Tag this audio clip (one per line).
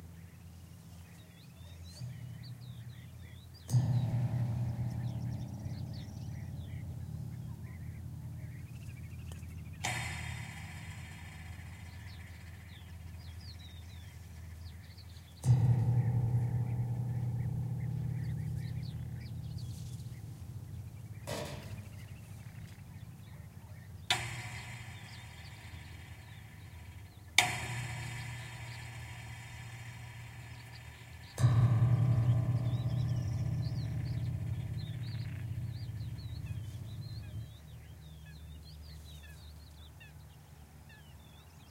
wire-fence
mournful
creepy
spring
metal
field-recording
weird
ambiance
nature
menacing